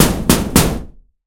iron snare recording in H4n ZooM at iron box car